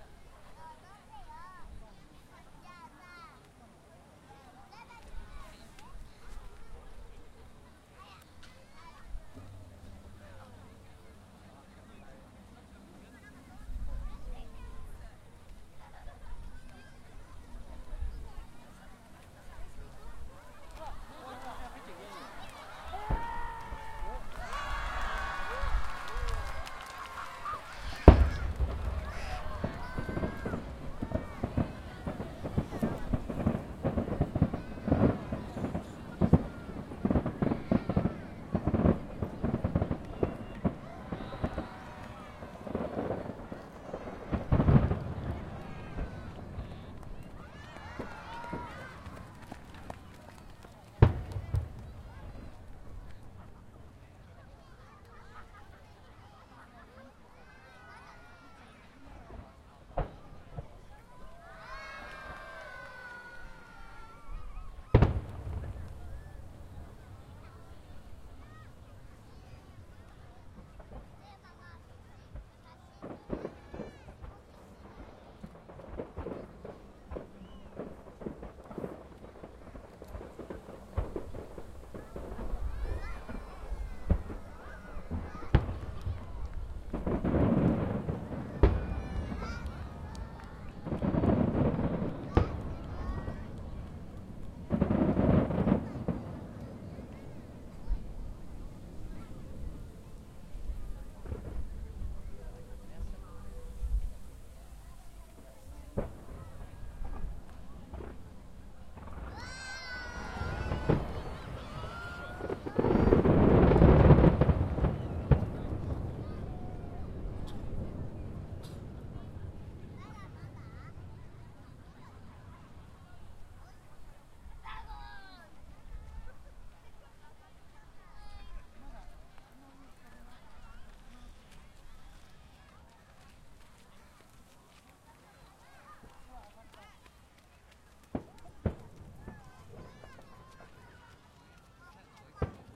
GORAZD 0271 tamagawa hanabi
Commemoration of the peace treaty fireworks on Tamagawa River. Public waiting for fireworks, gasping when they starts. Children talks and people happily scream. Recorded at August 15th 2014 19:30 on Tascam DR-40 with self made wind shield, manual level. Excerpt from half an hour long recordings.
ambiance, fire-works, people